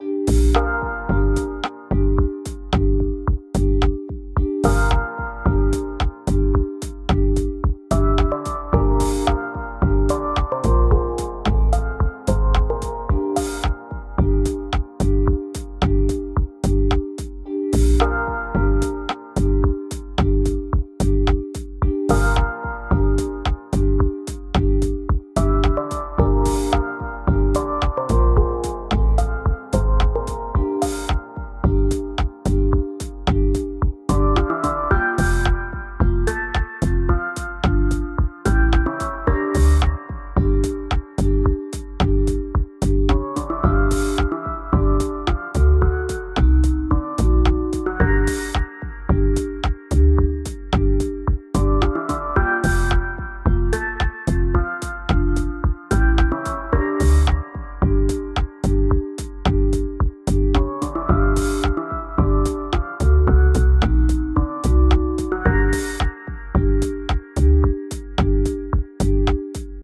Sunrise Session
It's sunrise & the party is slowly winding down.